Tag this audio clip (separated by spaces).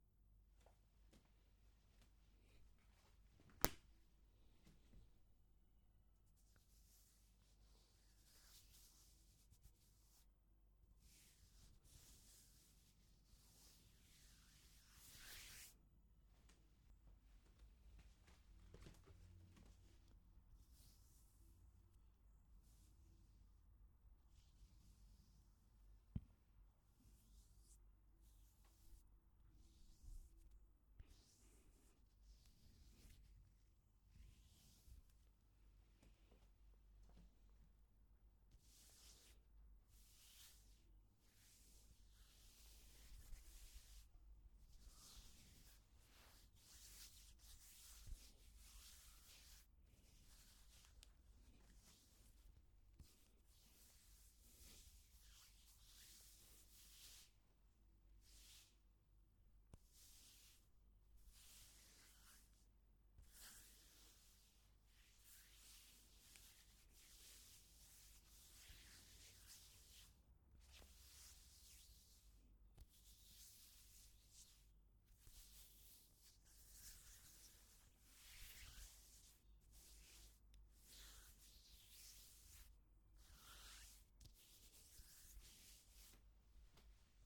face skin touch